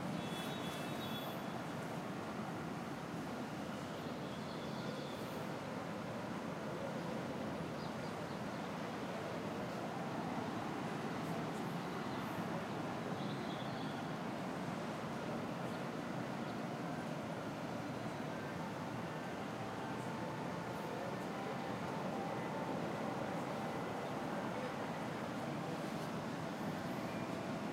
Mexico City Traffic
Recording of a highway taken from a rooftop